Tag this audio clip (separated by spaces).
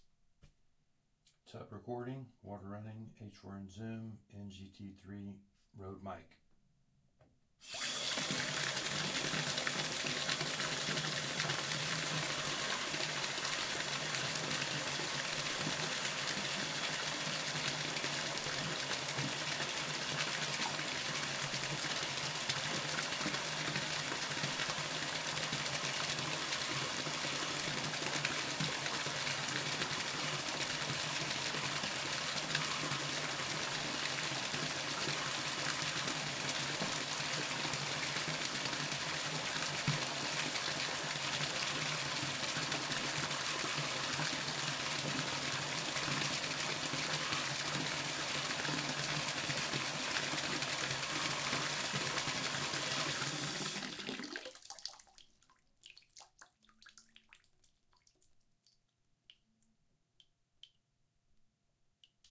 bath bathtub drain drip dripping faucet room shower sink splash tub water